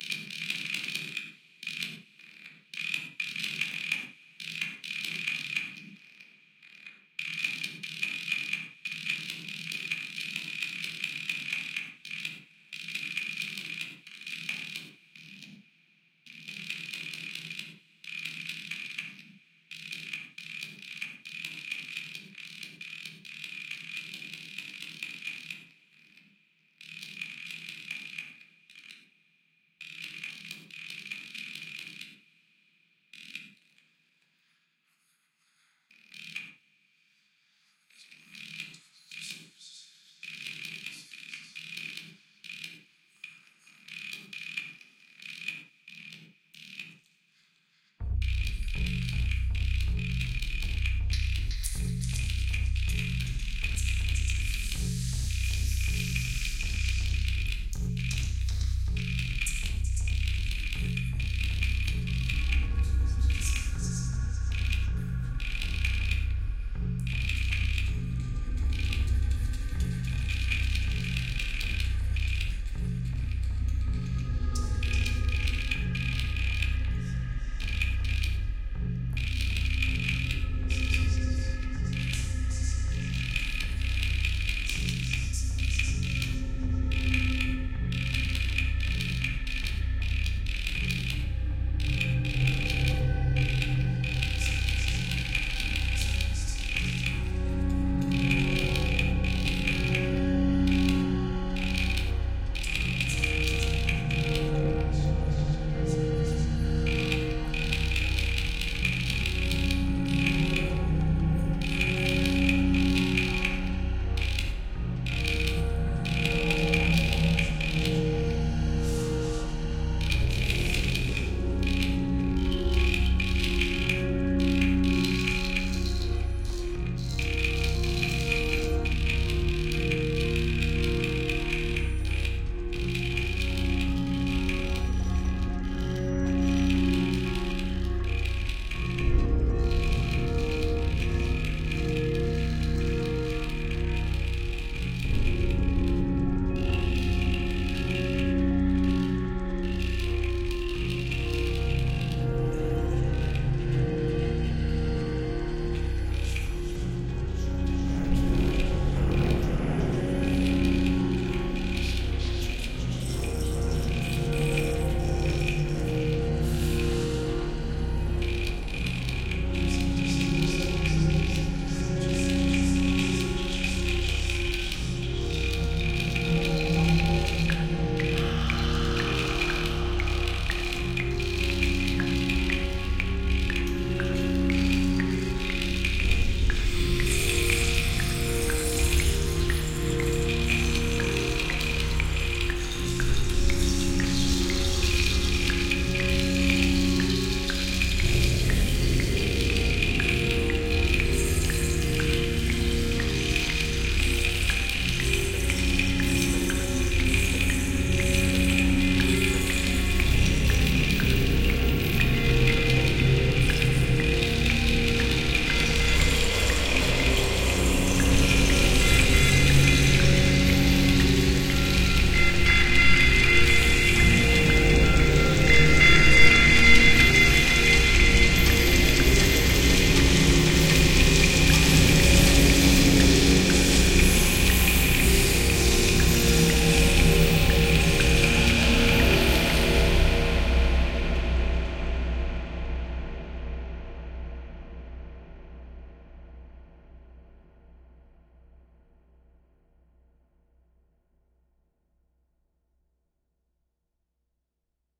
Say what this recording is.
A slowly building race against time with a Rubik's Cube. A product of granular synthesis in Pure Data.